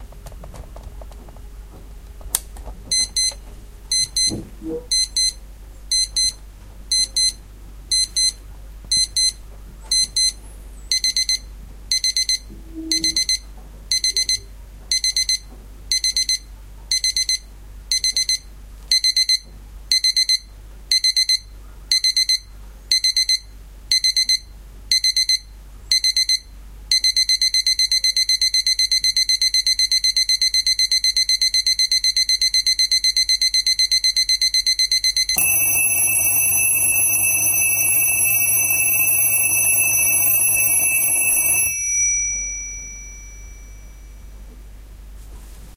CasioHC-DM-3
Testing sound recorded by Olympus DM-3 with High sensitive microphone
testing, DM-3, clock, compression, alarm, bell, recording, high